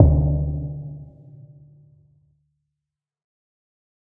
11 virus METALTANK
Exotic Electronic Percussion 5
electronic, exotic, percussion